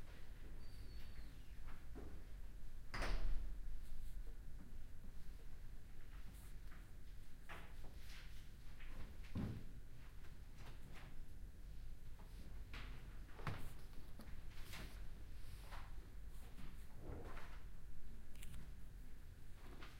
P7 QMPG reading 17
QM Library PG Reading Room quiet flicking pages